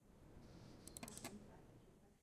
This record shows the sound given by "clicking" with the mouse several times. As we can hear it is found not only this tipical noise but also the intenal springs which sound is very particular. It is based on higher frequencies than the click itself. It was recorded at the UPF computer classrooms (Poblenou's campus) at Tallers building.